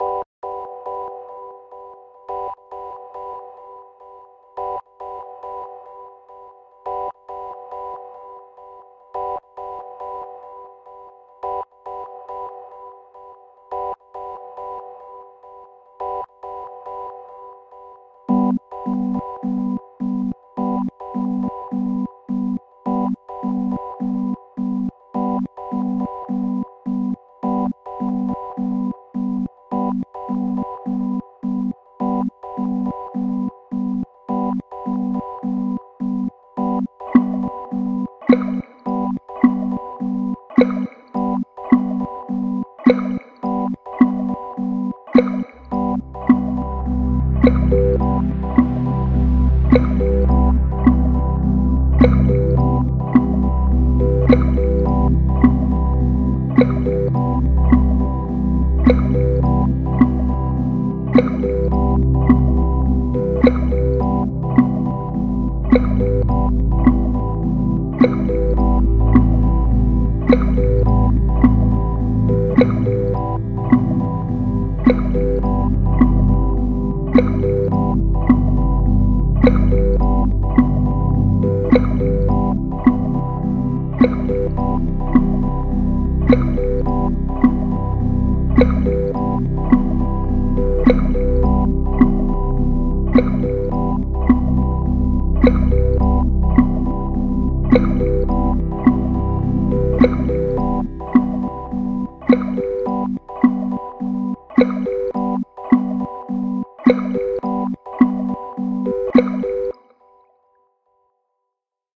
Short tune I made while messing around with music for podcasting. All made in ProTools.